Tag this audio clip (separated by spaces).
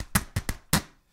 zipper noise natural vol 0 egoless sounds scratch